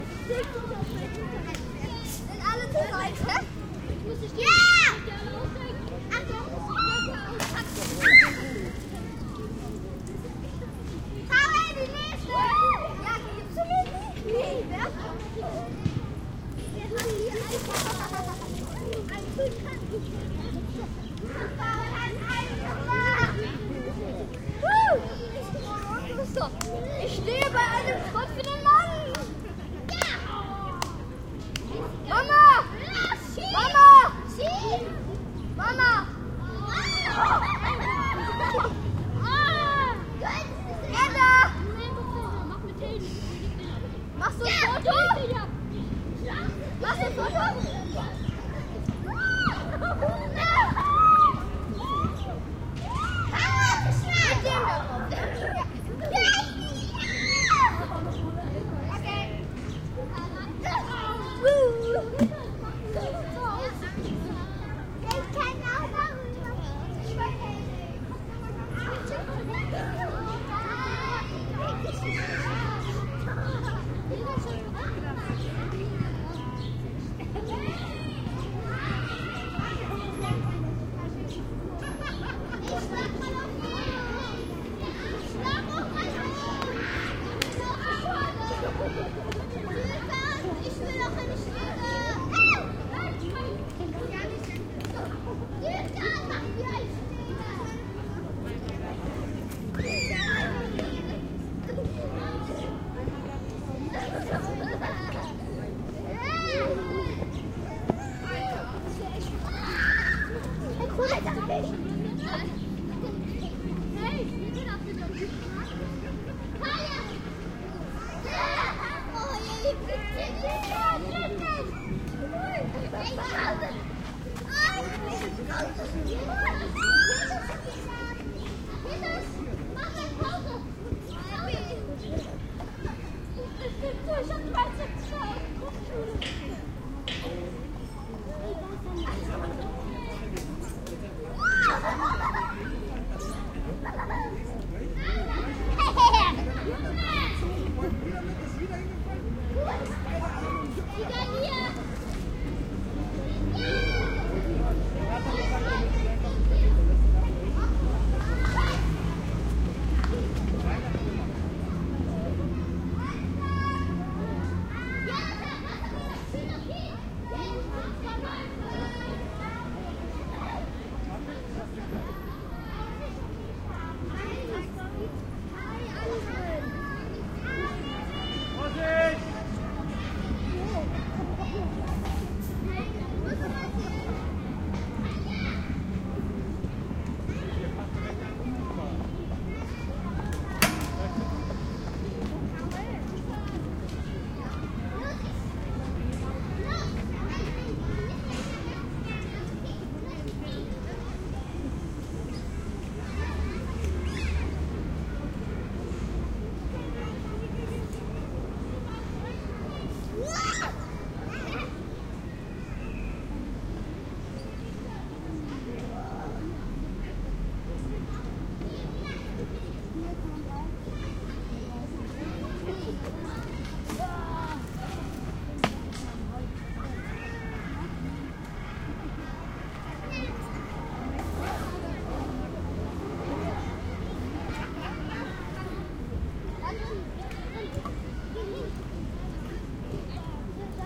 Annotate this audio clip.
Sitting on a playground at Lausitzer Platz in Kreuzberg, Berlin.
Recorded with Zoom H2. Edited with Audacity.